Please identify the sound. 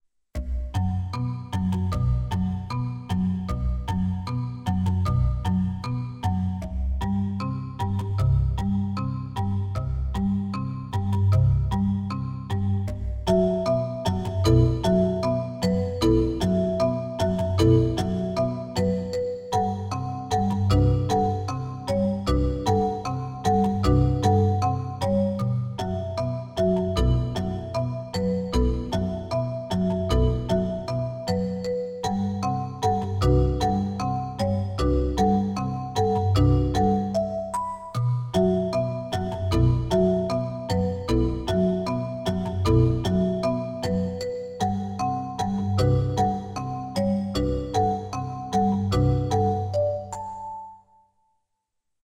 village main theme
A track created for RPG styled game villages. Created with a synthesizer and recorded with MagiX studio. Can easily be looped.
ambient, atmosphere, background, background-sound, music, village